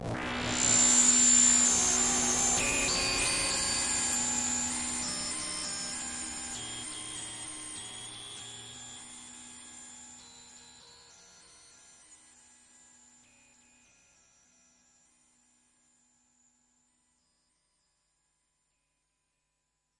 EFX sound created by Grokmusic on his Studios with Yamaha MX49
Futuristic, Sound-Effects, alien-sound-effects, Radio, Search